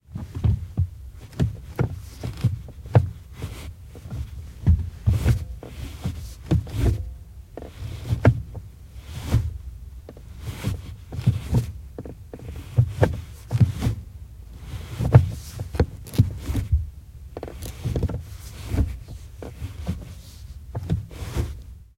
04 Renault duster Gearbox
Sound of Renault Duster gearbox inside car
car, duster, gearbox, renault